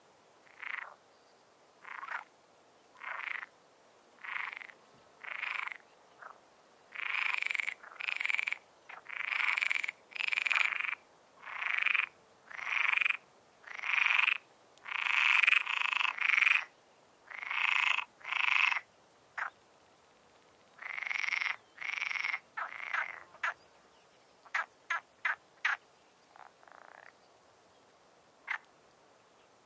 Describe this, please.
frogs croaking in a small pond near Doñana, S Spain. Sennheiser ME66+MKH30 into Shure FP24, recorded with Edirol R09 and decoded to M/S stereo with Voxengo VST free plugin